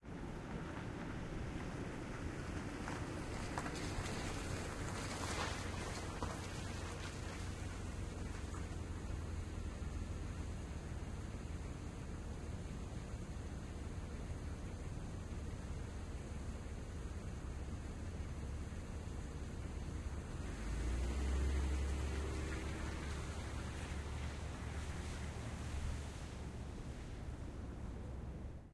Car arriving idling and pulling away.
street, idling, motor, auto, up